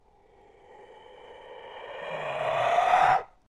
Ghostly Exhalation
Some horror sounds I recorded.
Thanks very much. I hope you can make use of these :)
breathing, creepy, creepy-breathing, disturbing, evil, ghost, ghost-breathing, ghostly, ghostly-breathing, haunting, horror, scary, scary-breathing